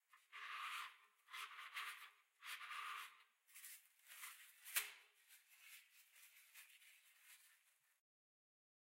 This is the sound of nails scraping a toilet paper roll.
Effects: noise removal, basic EQ to clean it up